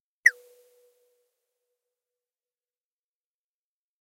Tonic Whistle
This is an electronic whistle sample. It was created using the electronic VST instrument Micro Tonic from Sonic Charge. Ideal for constructing electronic drumloops...
drum, electronic